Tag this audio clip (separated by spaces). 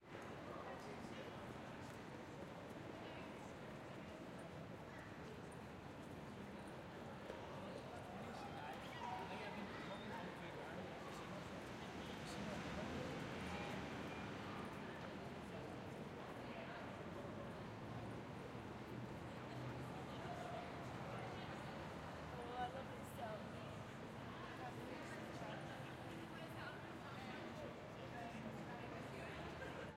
Ambience city crowd field-recording Glasgow H6n people street traffic walla